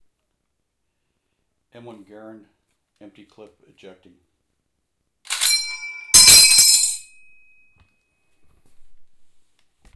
Emptying empty clip from an M1 Garand
British, clip, ejecting, Emtpy, Garand, gun, M1, M1-Garand, rifle, weapon, WWII